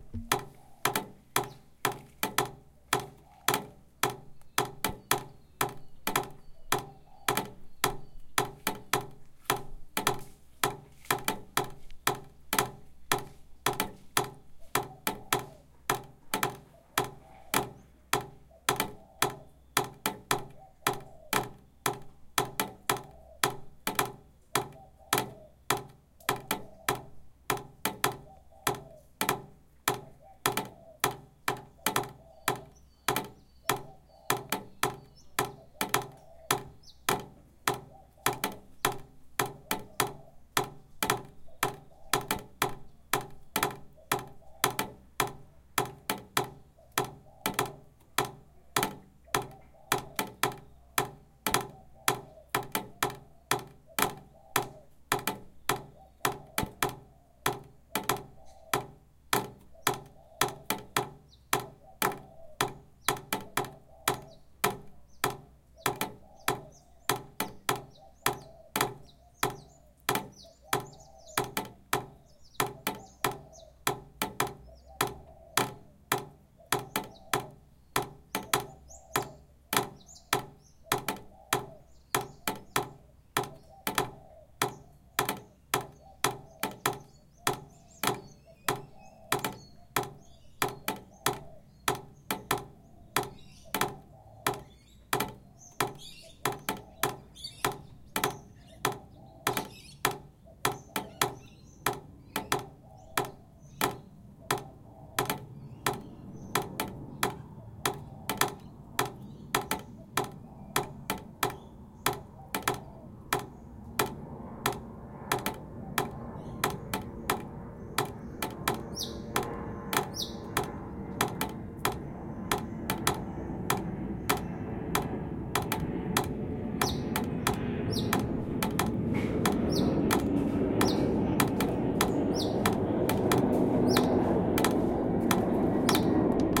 Drops on a drain pipe
This is a recording taken of a distinct almost rythmic drip on to a long resonate drain pipe. Sounded nice so I had to get it.
drainpipe, water, random